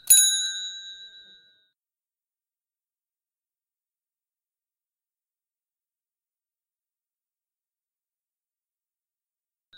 Bicycle Bell from BikeKitchen Augsburg 04
Stand-alone ringing of a bicycle bell from the self-help repair shop BikeKitchen in Augsburg, Germany
cycle, pedaling, rider